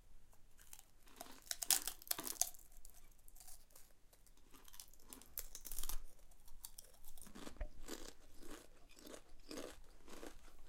eating kettle chip
the sound of me eating a crunchy kettle chip. recorded in a professional recording studio with a SONY linear PCM recorder held a few inches away from mouth.
food, eating, chips, crunchy, aip09